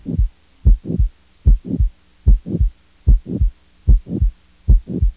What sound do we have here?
Cardiac and Pulmonary Sounds
sounds for medical studies